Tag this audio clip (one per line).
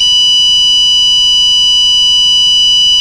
frees
guitar
multisample
sample
sound
tuner